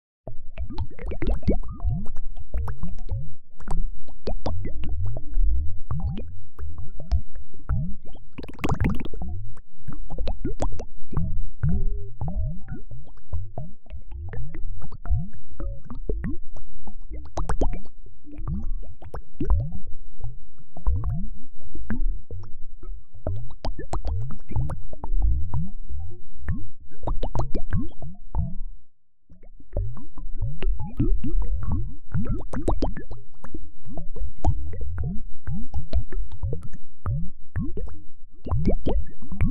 A sample I made using Ableton Grain's delay on pieces of my sample "crystal underwater"